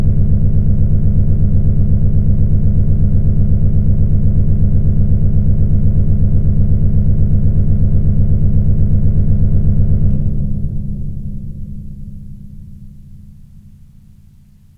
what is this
This sound is generated by an 80's synthesizer ensoniq sq1 plus which memory banks have gone bad. I recorded the sound because I thought that it would be excellent as a creepy sci-fi spaceship sound